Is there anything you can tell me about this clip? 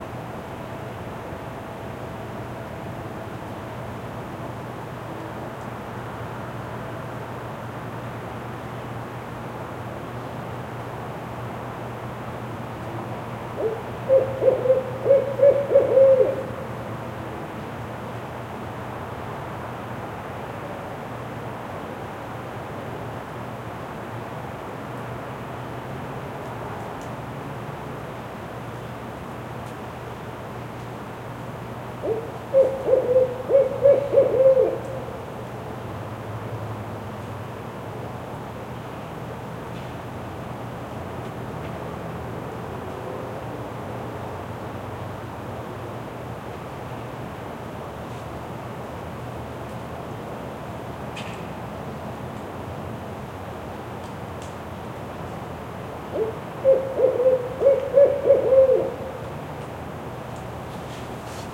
Urban owl hoot
Owl in the backyard in a residential area near a major street. Recorded with a Audio-Technica BP4025 X/Y Stereo mic, SD 302 mixer, and Zoom H5.
ambient, bird, city, field-recording, hoot, night, nighttime, owl, urban